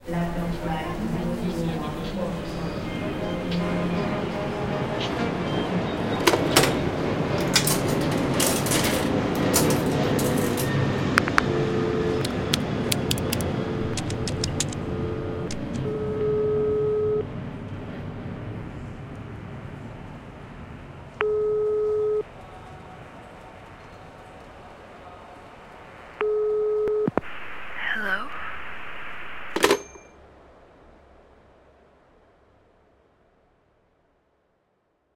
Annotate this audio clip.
A lover finds it hard to say goodbye at the airport.